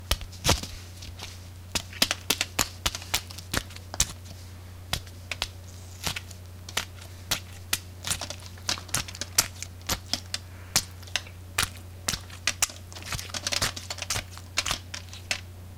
Stabbing an orange to get stabbing/murder sound effects
squishy, stabbing, Murder